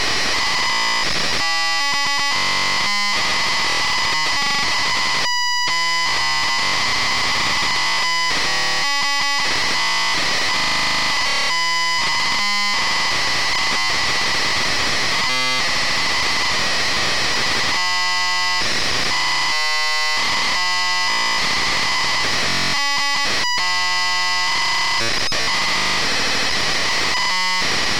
Modular Synth 8-bit Data Transfer Simulation
Simulation of Data Cassettes ,Dial-up ,Low bit data transfer with Modular synthesizer.
Created with VCV Rack.
Using Frankbuss Formula module.